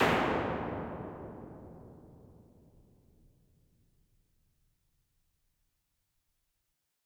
Large Dark Plate 04
Impulse response of a large German made analog plate reverb. This is an unusually dark sounding model of this classic 1950's plate. There are 5 of this color in the pack, with incremental damper settings.
Impulse, IR, Plate, Response, Reverb